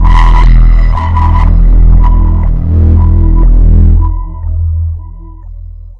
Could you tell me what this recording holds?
THE REAL VIRUS 14 - HEAVYPULZLEAD - E1
A pulsating sound, heavily distorted also, suitable as lead sound. All done on my Virus TI. Sequencing done within Cubase 5, audio editing within Wavelab 6.
lead, pulsating, multisample